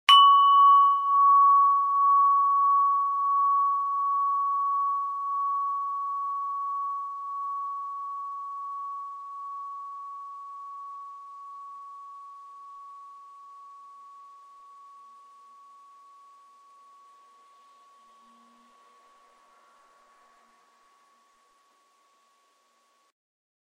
barn chime2
Individual wind chime sound
Recorded on Zoom H4n